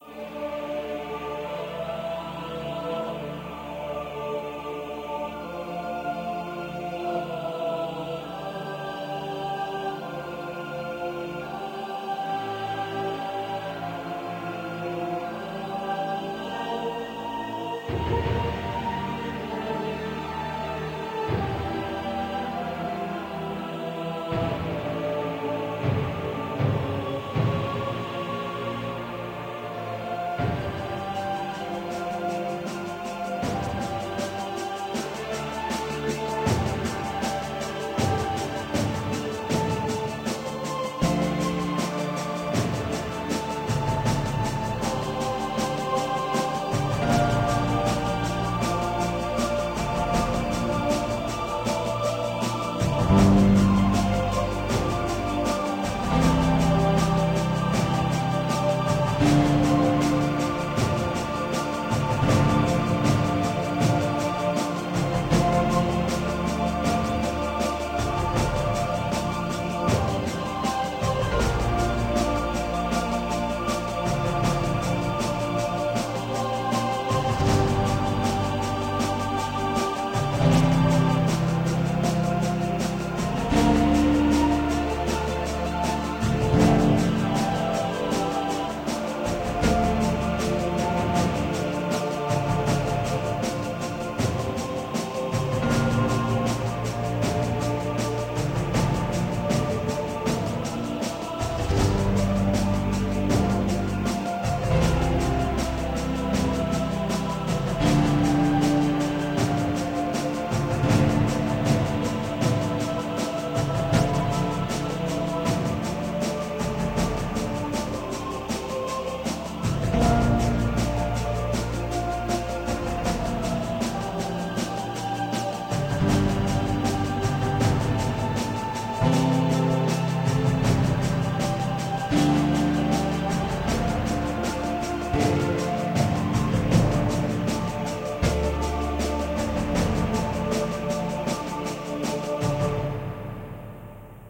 Epic chorus-song.
Sounds & Synths:Epic voices By Sf2,Ableton live,Kontakt.